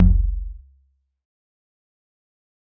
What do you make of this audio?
Percussion created by layering various drum sounds together and applying a few effects in FruityLoops, Audacity and/or CoolEdit. Layered kick and tom drums.
Drum, Kick, Layered, Percussion, Processed, Thump, Tom